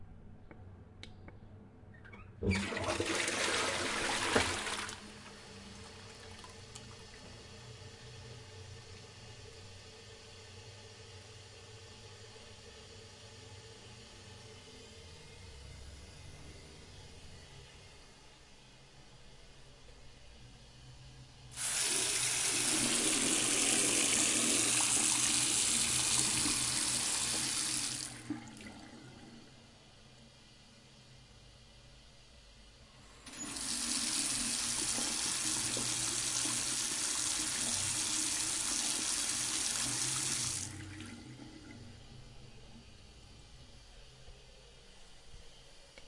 Tolet Flushing and sink water
flushing, tolet